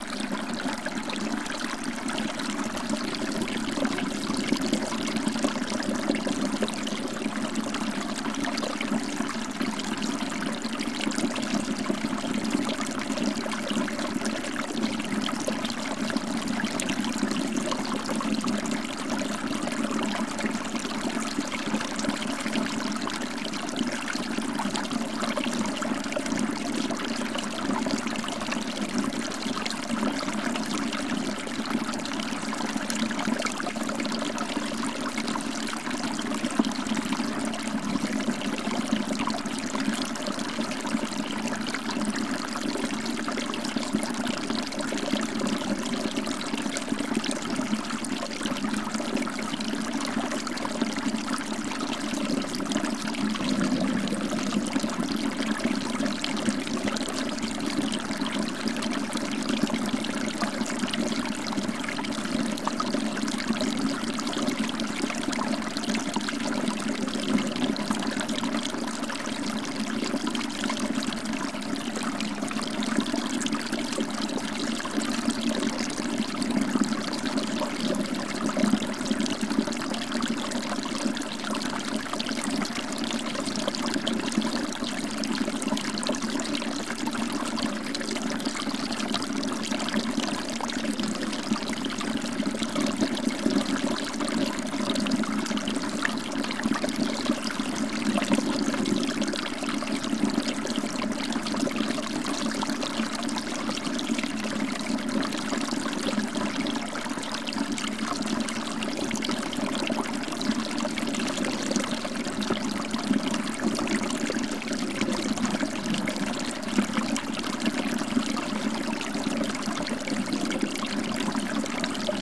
national water 01
One in a series of recordings of a small stream that flows into the Colorado River somewhere deep in the Grand Canyon. This series is all the same stream but recorded in various places where the sound was different and interesting.
stream, ambient, loop, dribble, relaxation, flow, river, noise, field-recording, relaxing, water, trickle